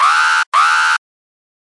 3 alarm long b
2 long alarm blasts. Model 3
alarm, futuristic, gui